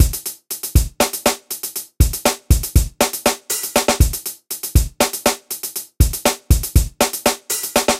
120bpm drum loop
just a short drum loop :)
drum, loop, synthesizer, dubstep, drums, 120bpm